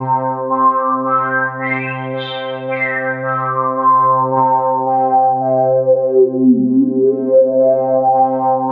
A member of the Alpha loopset, consisting of a set of complementary synth loops. It is:
* In the key of C major, following the chord progression C-F-C-F.
110bpm, drone, synth